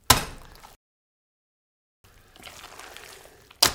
Meat Slap 4
Meat Slap Guts Fall